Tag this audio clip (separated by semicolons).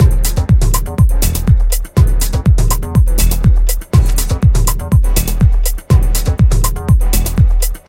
122bpm; beats; constructionkit; dj; electronica; house; loop; mix; music; part; remix; songpart; synths; tech; techno